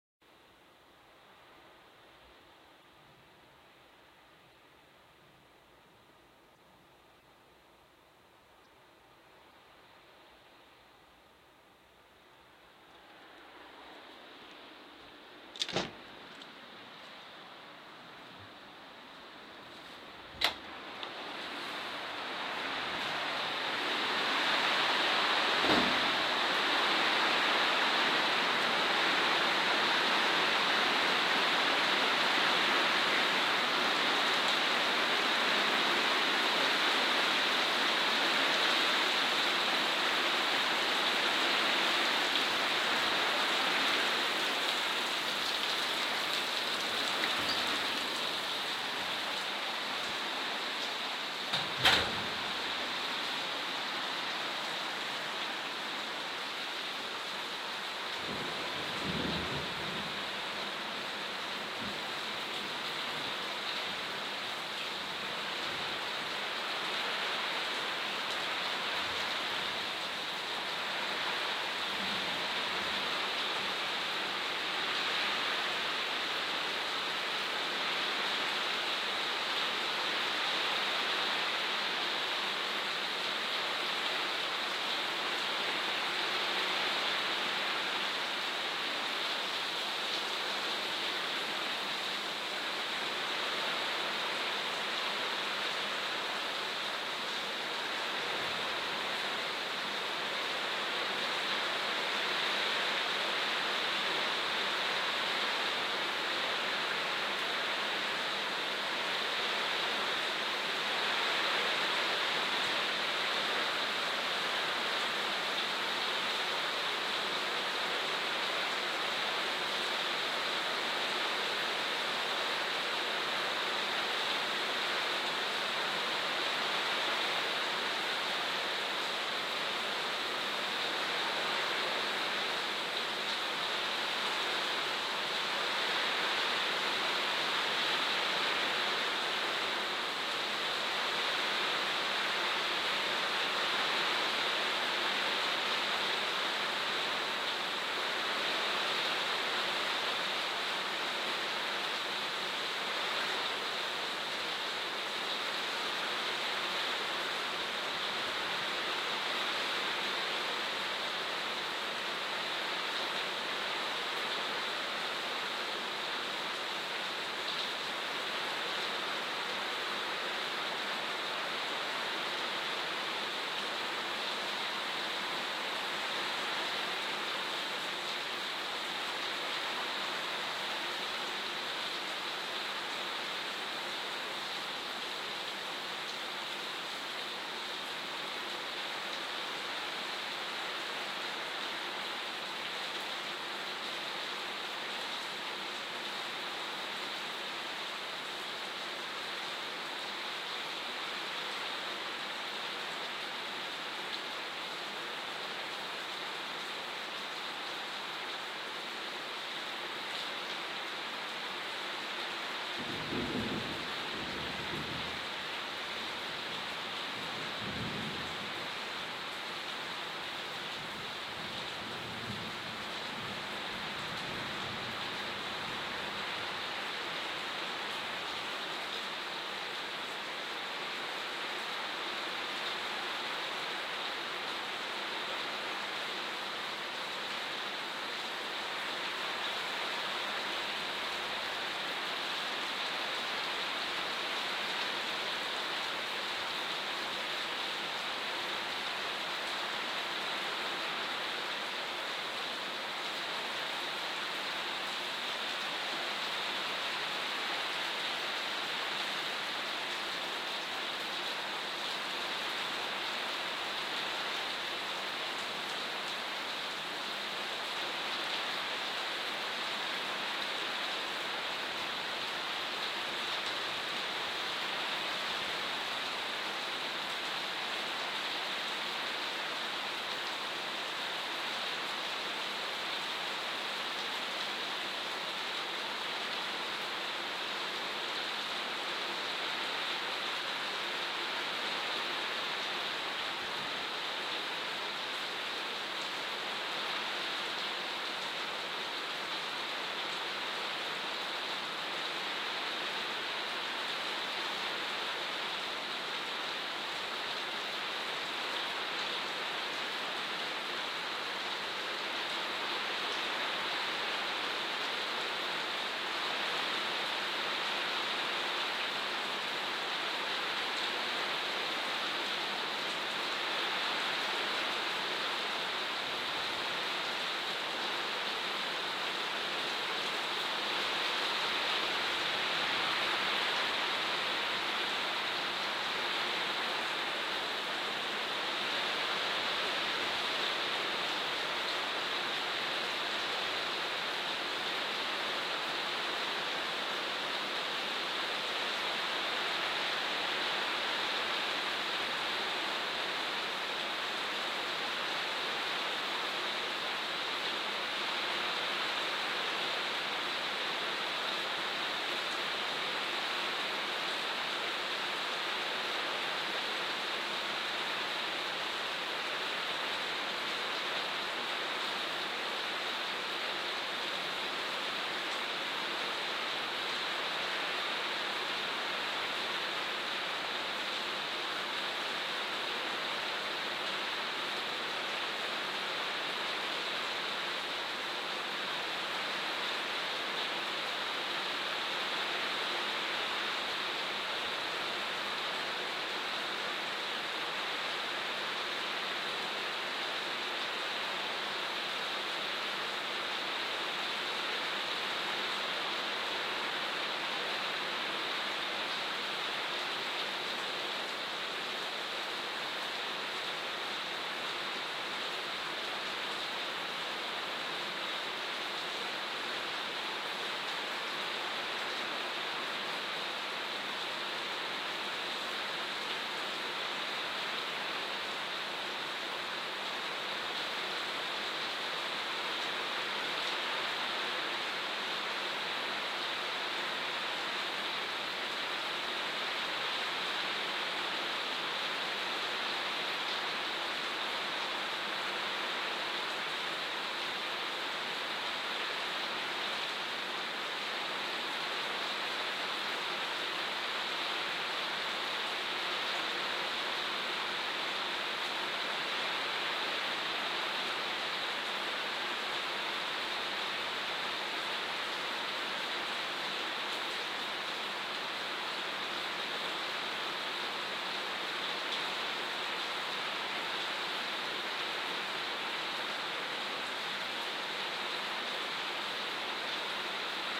Lluvia audio original
Sonido de lluvia grabada mientras caía sobre el techo de láminas de latón de una casa campestre. El audio está sin editar.
rain, relax, lluvia